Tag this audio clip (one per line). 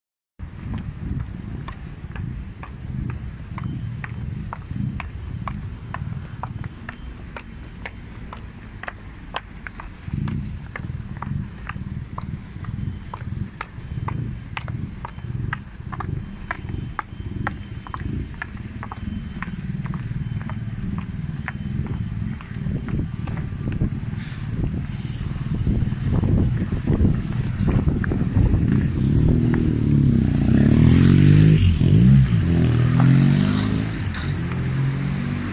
car
city
Lisbon
noise
sound
street
traffic